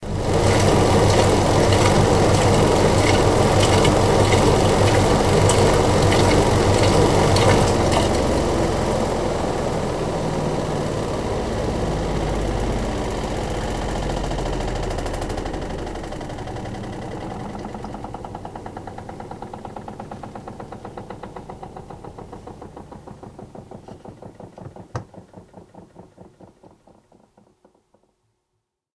Washing Machine 8 Spin Cycle
bath, bathroom, domestic, drain, drip, dripping, drying, faucet, Home, kitchen, Machine, mechanical, Room, running, sink, spin, spinning, tap, wash, Washing, water